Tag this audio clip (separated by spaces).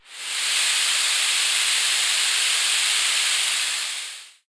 Noise Sunvox Static